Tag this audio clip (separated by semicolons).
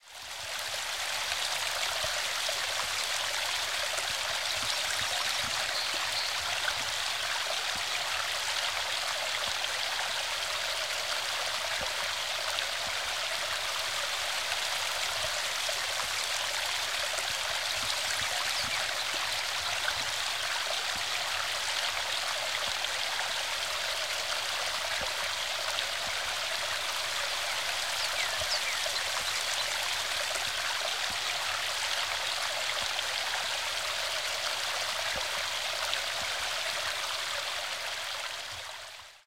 field-recording; forest; peaceful; creek; gurgling; spring; nature; serene; nature-sounds; ambience; water